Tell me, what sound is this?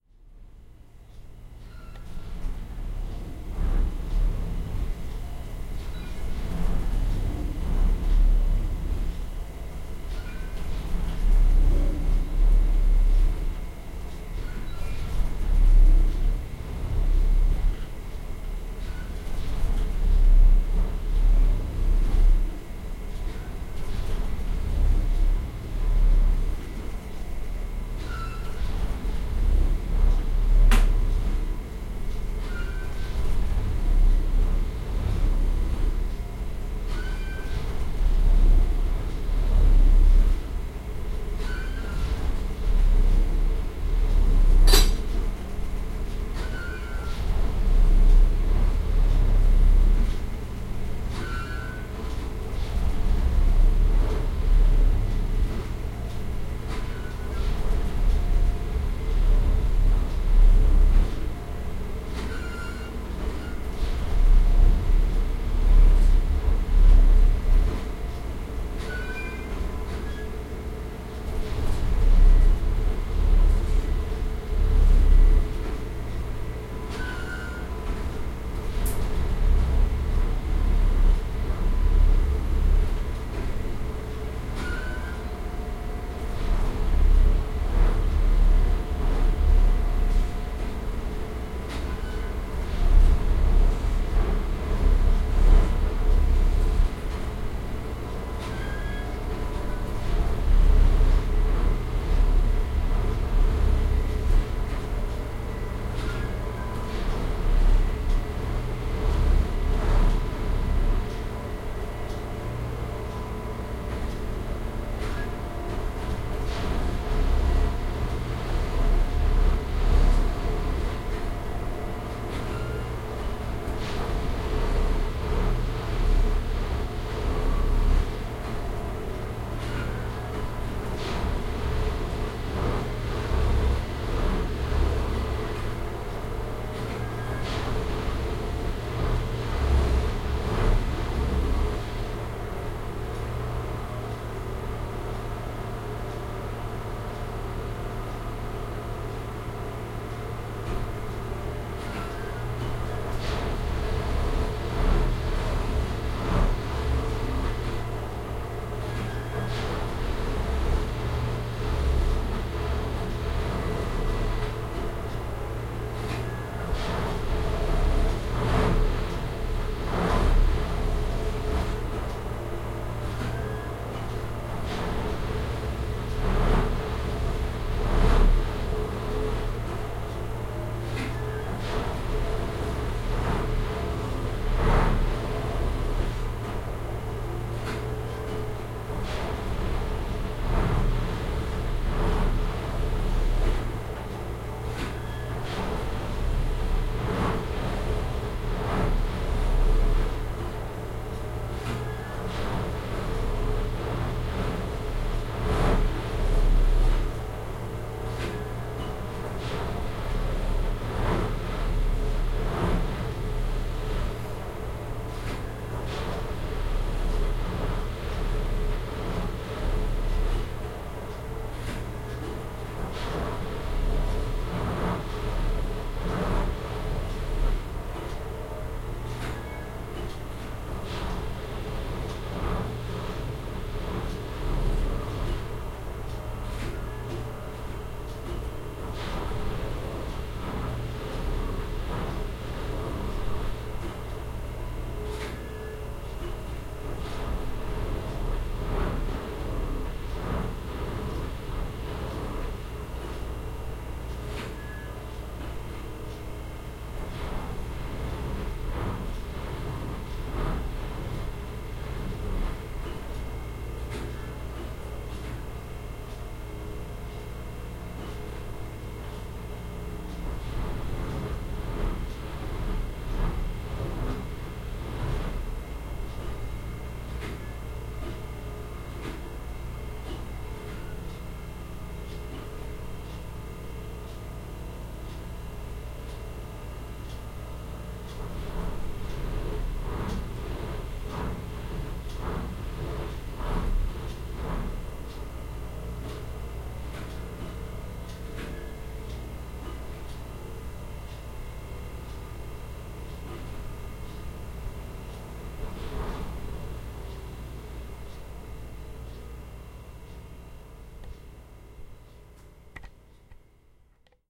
This was recorded in my kitchen next to a railway, late at night when there was maintenance going on on the line. A heavy unit called a tamper went past, tamping down the ballast (stones) that go under the tracks. Lots of sub-bass as the whole building gets vibrated and at -04:29 a balanced teapot lid puts itself onto the teapot.